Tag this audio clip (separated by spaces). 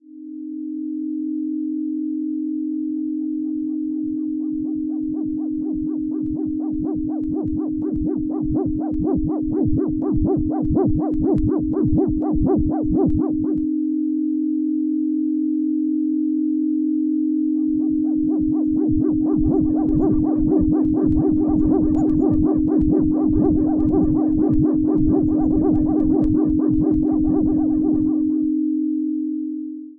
sintetico tono movil